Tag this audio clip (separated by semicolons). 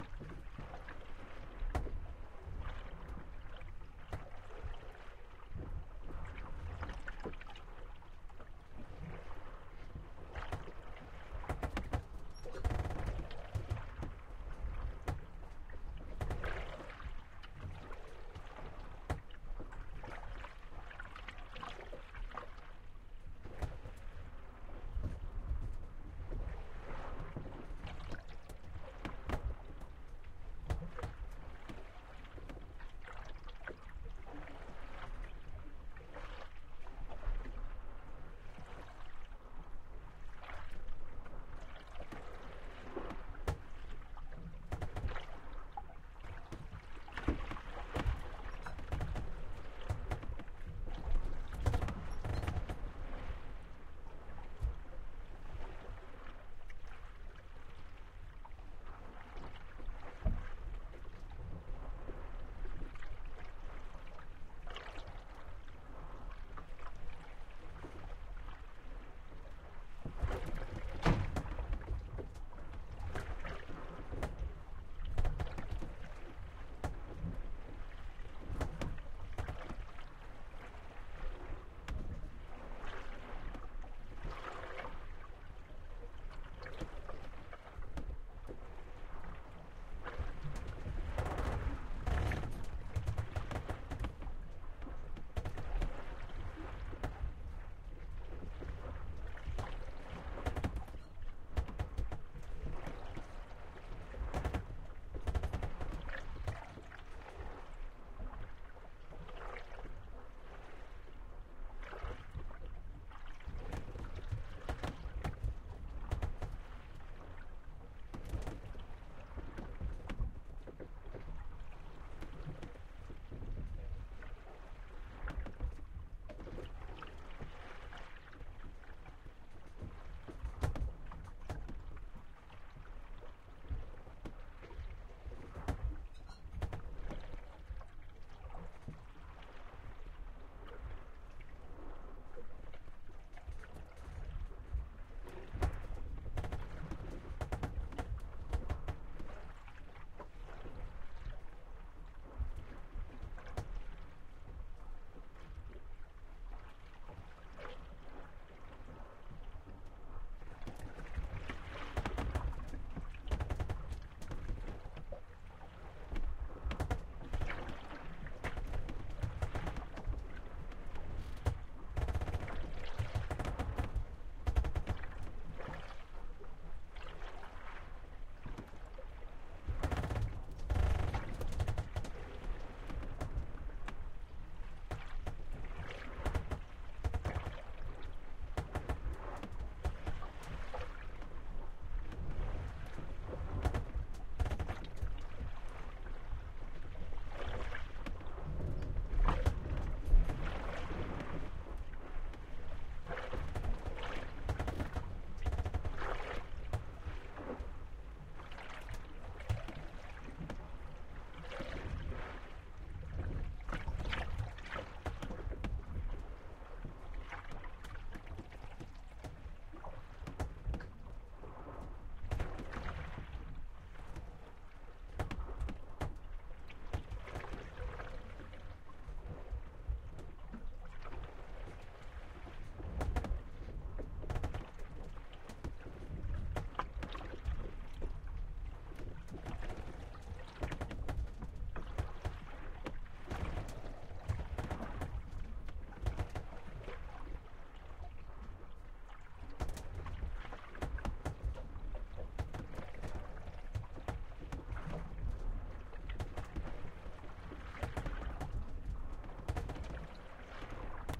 Atlantic; Sailing; boat; creak; ocean; offshore; rushing; sea; ship; water; waves